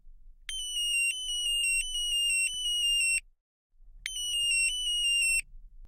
Toy Gun 4
A tiny toy gun recorded in my vocal booth.
Recorded with a RØDE NT-2A.